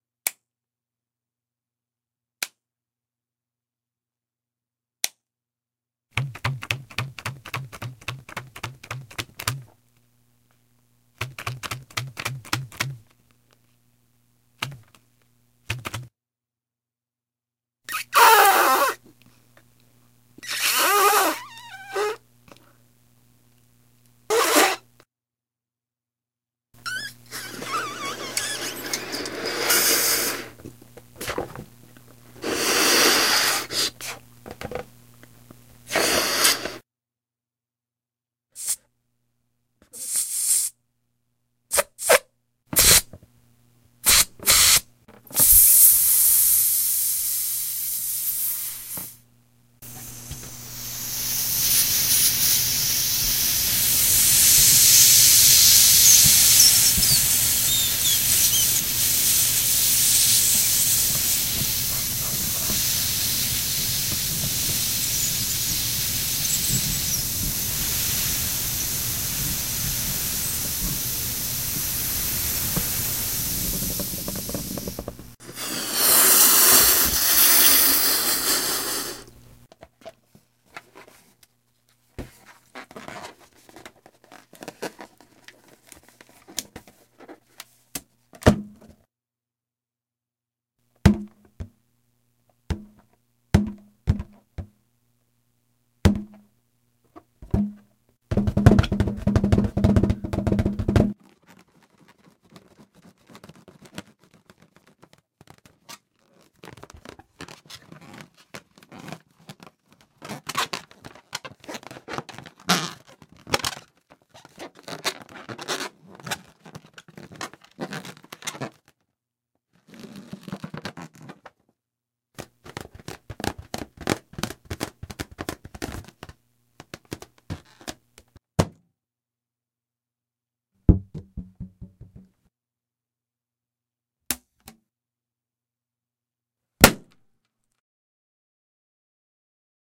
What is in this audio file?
Percussive sequence interrupted by a balloon blowing up, squeaks, letting air out; hisses and thick streams, tying the balloon off, bouncing the balloon, general balloon noise.
air, balloon, balloon-blowing, bouncing, hiss, percussive, squeak, stream, tying